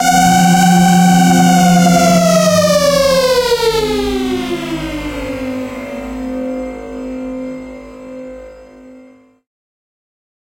Broken Transmission Pads: C2 note, random gabbled modulated sounds using Absynth 5. Sampled into Ableton with a bit of effects, compression using PSP Compressor2 and PSP Warmer. Vocals sounds to try to make it sound like a garbled transmission or something alien. Crazy sounds is what I do.
loop, granular, samples, evolving, texture, vocal, atmosphere, artificial, space, cinematic, synth, pads, glitch, industrial, ambient, drone, horror, soundscape, pack, experimental, electronic, dark